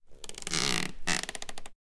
Bench Creak 2
Sound of an old wooden bench creaking
Wooden, Bench